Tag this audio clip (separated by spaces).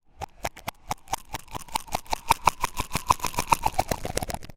clog pill MTC500-M002-s13 bottle hooves plastic horse